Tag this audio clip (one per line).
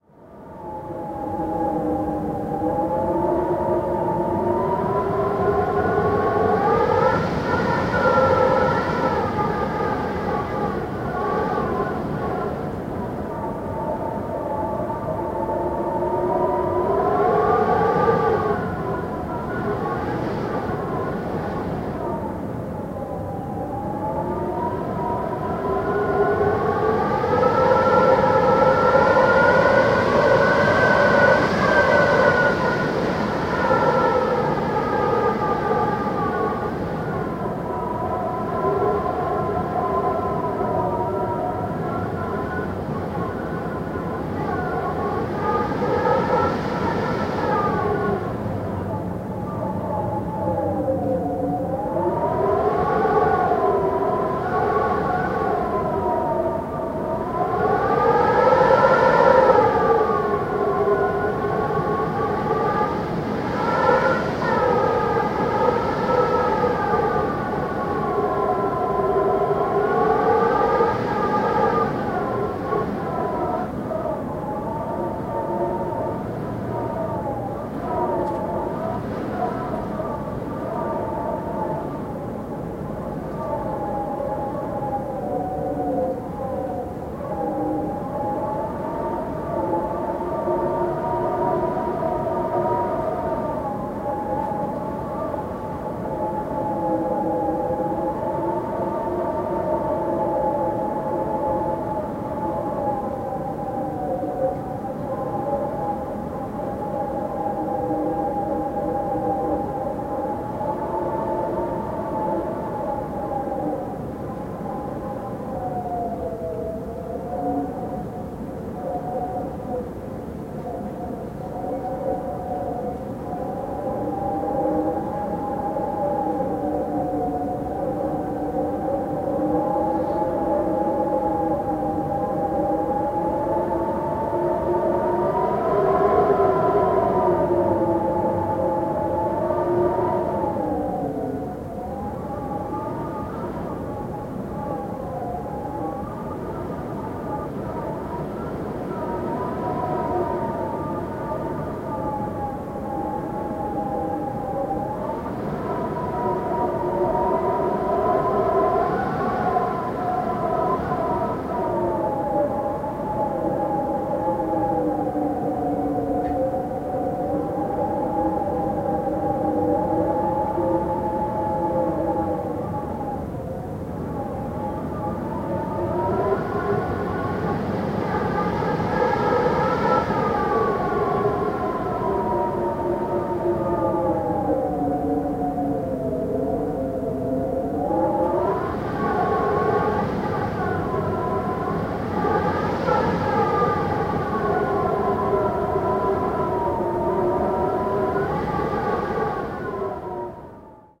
interior
weather
wind
field-recording
whistle